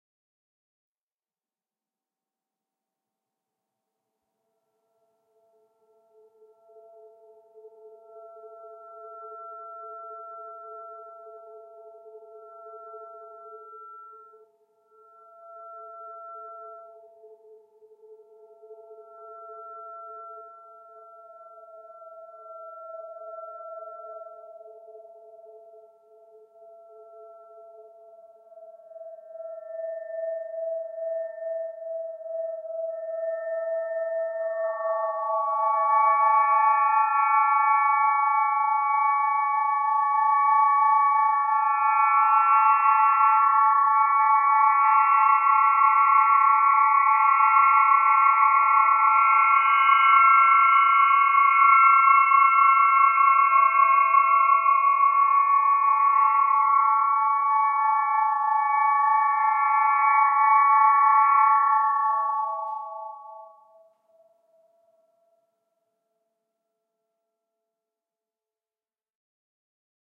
dreaming SMETAK - 30.08.2015; ca. 14:00 hr
"dreaming Smetak" is a sound installation for 36 microtonal, aeolian, acoustic guitars based on the original idea and concepts of the composer Walter Smetak (1913-1984). It was a commission of the DAAD Artists-in-Berlin Program for the mikromusik - festival for experimental music and sound art. It took place in the attic of the Sophienkirche in Berlin and was opened for visitation between the 27th and 30th of August 2015.
For this version of it, 18 acoustic guitars were used as active sound sources while other 12 served as loudspeakers – mounted with small transducers –, and the remaining 6 were simply placed as visual objects in contrast to the ironmongery structure of the attic ceiling of the church. The first group of guitars were equipped with contact microphones attached to specially designed preamplifiers. Their sound actor was only and solely the wind.
Aeolian-sound, Aeolian-harp, acoustic-guitar, sound-installation, sound-art, Walter-Smetak